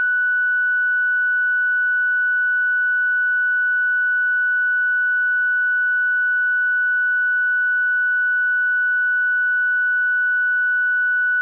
Doepfer A-110-1 VCO Triangle - F#6
Sample of the Doepfer A-110-1 triangle output.
Captured using a RME Babyface and Cubase.
A-100, A-110-1, analog, analogue, basic-waveform, electronic, Eurorack, modular, multi-sample, oscillator, raw, sample, synthesizer, triangle, triangle-wave, triangular, VCO, wave, waveform